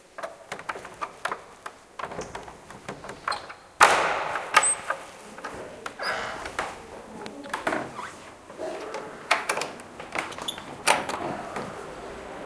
door.echo
knob of a century-old door echoing in the silent, large hall of a convent /picaporte de una puerta muy antigua en un convento, resonando con eco
city, door, echo, field-recording, hall